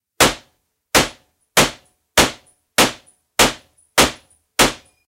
GUNRif M1 GARAND GUNSHOT MP
Field recording of an M1 Garand being shot in a small room. This sound was recorded at On Target in Kalamazoo, MI.